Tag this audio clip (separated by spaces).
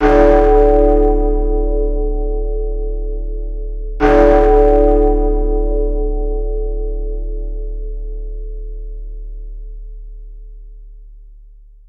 big-ben large-bell 2-bell-strikes tollbell two-bell-strikes two-oclock